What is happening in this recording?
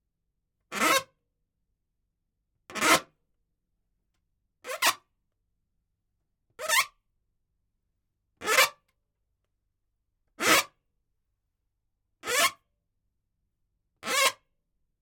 Recorded as part of a collection of sounds created by manipulating a balloon.